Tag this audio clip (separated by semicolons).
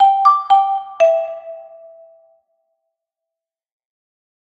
announcement; public; bus; busses; railway; trains; airport; station; railroad; airports; sound; jingle; train; transportation; stations; transport